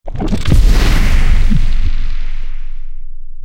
A spell sound to be used in fantasy games. Useful for buffing up, or transforming, a character.
magical, gamedev, sfx, fantasy, indiedev, videogames, magic, gamedeveloping, wizard, indiegamedev, magician, rpg, epic, spell, game, effect, witch, gaming, fairy, video-game, game-sound